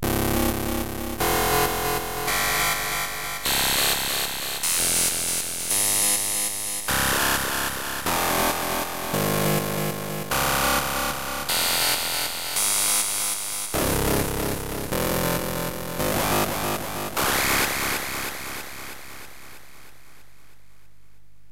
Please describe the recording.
Dark Synth analog electronic tweaking distortion motion sequence